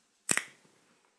Variation of the first crackle sound.